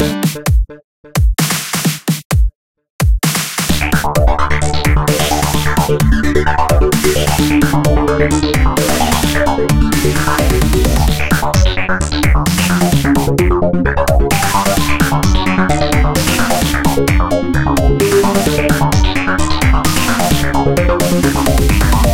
my 1 st attempt
Game Music Alien
Games Developer
Play Games